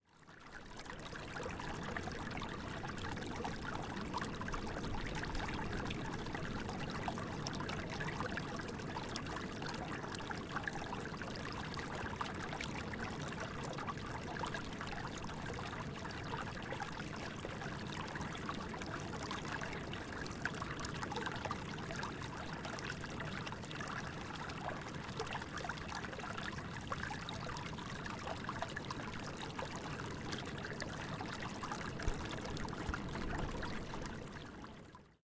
reef-emptying-water-sea-in-background-cobblestones
Some sea-sounds I recorded for a surfmovie. It features a reef that empties. Recorded in Morocco
background, shore, waves, reef, morocco, wave, cobblestones, emptying, away, distant, sea, water